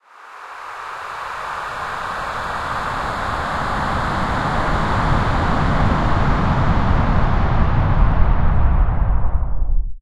Black Hole
Made out of white noise. Tried to give that feeling as if being pulled into a blackhole.
alien, atmosphere, black-hole, cosmos, futuristic, game, movie, planet, sci-fi, space, star, sweep, synth, void